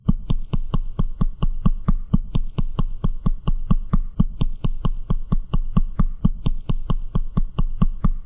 teeth chatering with some reverb